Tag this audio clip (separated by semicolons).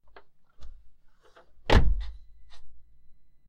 bang; car; close; door; outside; shut